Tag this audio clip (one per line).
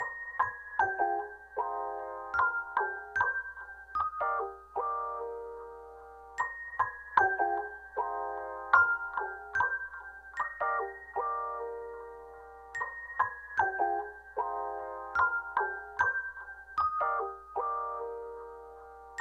bpm
chill
pack
music
relax
out
ambient
loop
beats
beat
hiphop
75
lofi
samples
melody
lo-fi
sample
loops